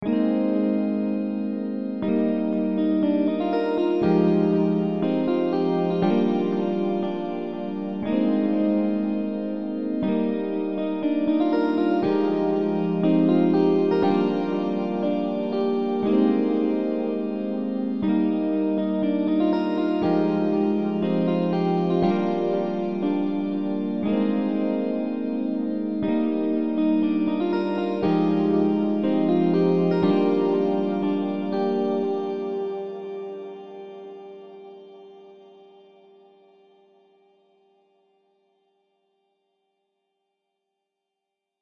rainclouds- epiano riff 4
Chords are G#, D#, Fm7, Gm7. 120 bpm.
Advanced, Bells, Chill, Chords, Easy-listening, Electric, E-Piano, Full, House, Lo-Fi, Piano, Preset, Progression, Riff, Soft, Tempo